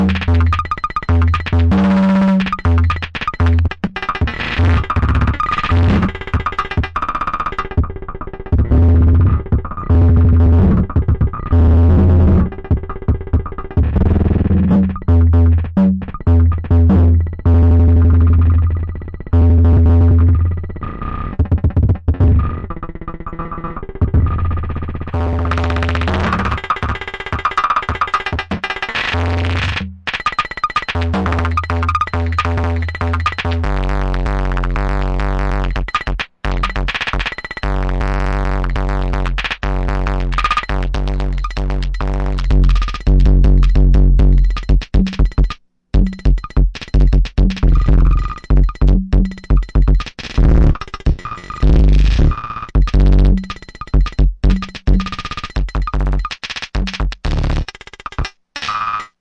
Korg Volca Beats + Moog Minifooger MF Drive
analog, beat, breakcore, distorted, distortion, drum, drums, electronic, experimental, gabber, hard, loop, noise, rhythm, weird